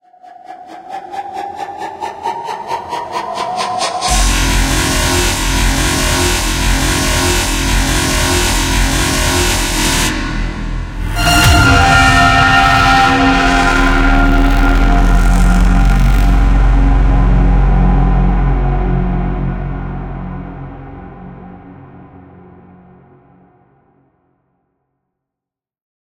Jump-Scare 7
Remix of sound effects to provide a jump scare for a Halloween prop
pneumatic, jump-scare, creepy, prop, audio, scare, build, sound-effect, fright, animated, trigger, animatronic, speaker, jumper, horror, remix